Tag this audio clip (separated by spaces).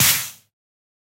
bar coffee espresso field-recording machine